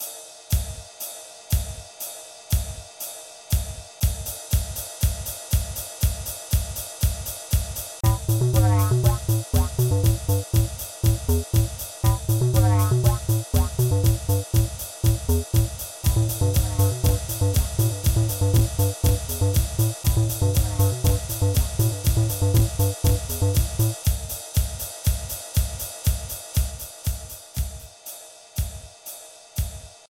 Loopy Sound Effect Jam
Nice sound to use it as loops and ringtones.
funky, jam